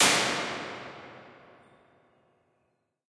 Small Plate 04
Impulse response of an American made stainless steel analog plate reverb. There are 5 impulses of this device in this pack, with incremental damper settings.
Impulse, Response